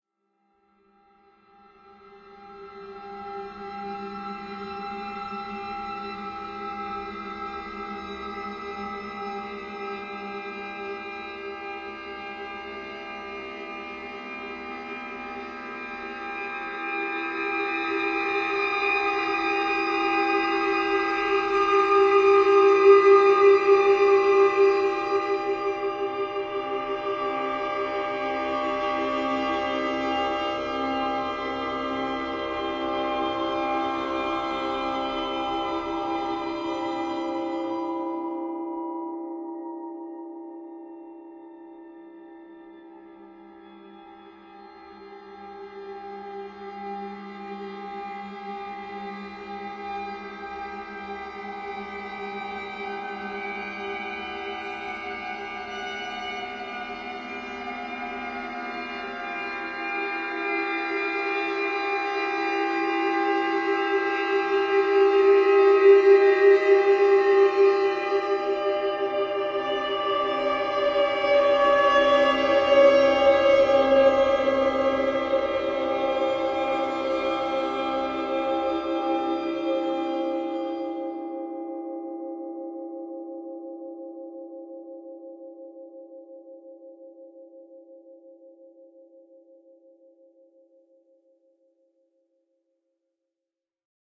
breath to sitar2
Just some examples of processed breaths form pack "whispers, breath, wind". Comb-filter patch in which a granular timestretched version of a breath is the 'noisy' exciter of the system (max/msp) resulting in a somewhat sitar-like sound.
As used in the composition "The Sigh"
composition, string, drone, breath, electronic-music, soundtrack, sitar, processed, air, atmospheric, tension, eery, suspense, high, comb-filter, electronic